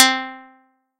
LECOINTRE Chloe son2
This synthetic sound represents the first note of a guitar. I wanted to retranscribe the emotion of the sound of a guitar and especially its first note.
first, guitar